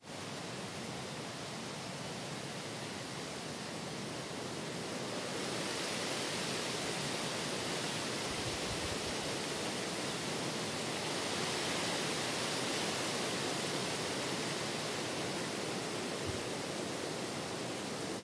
A gust of Wind in a forest
A gust of wind in a northern forest. There were a lot of pine trees, and a bit of spruces. A pine forest.
In the middle of the sound wind becomes stronger, and fades away a bit.
It was recorded in summer of 2014.